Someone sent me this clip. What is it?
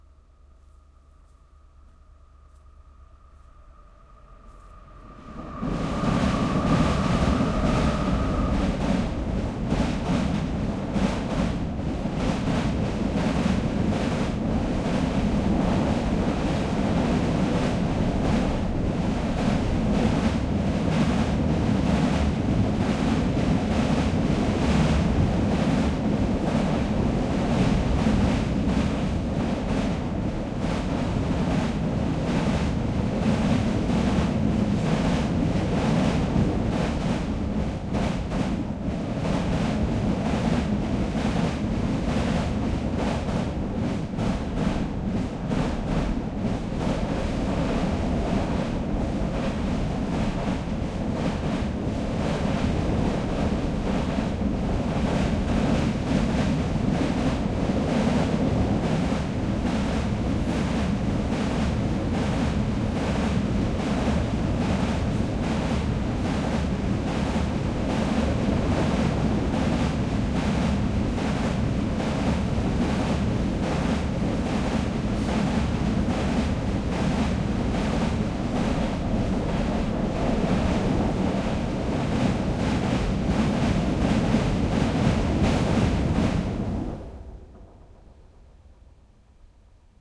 Freight train going over small bridge. Recorded using a Sony PCM M-10.